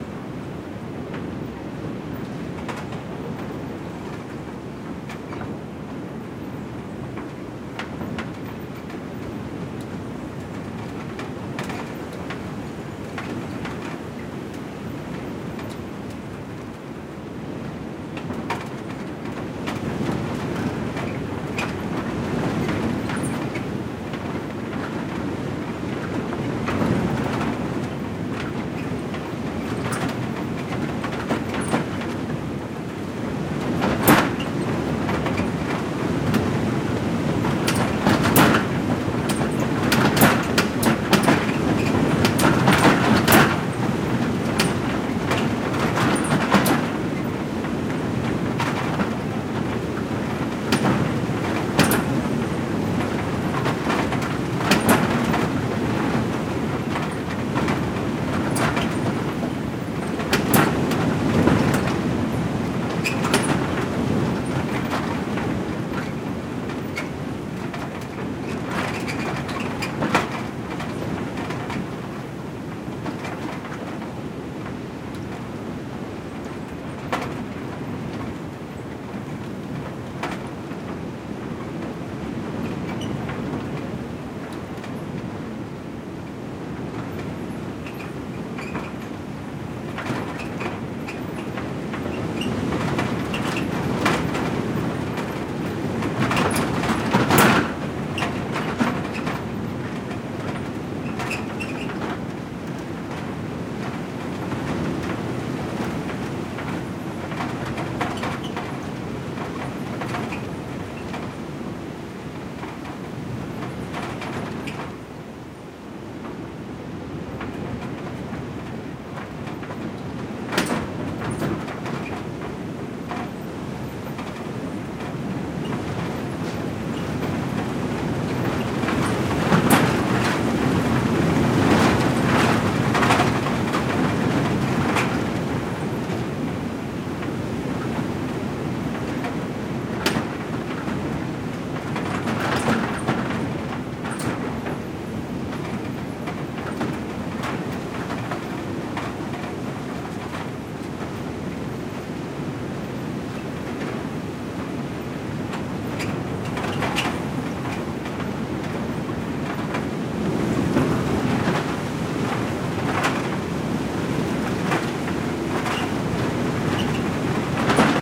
Barn Wind 004
This is a recording in a run-down old barn during a heavy windstorm. Lots of rattling shingles, doors, etc.
Recorded with: Sound Devices 702T, Sanken CS-1e
storm, door, rattle, shake, wood, barn, gust, gate, country, wind